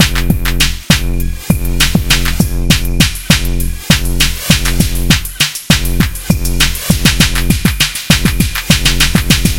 simple drum loop, 100 bpm.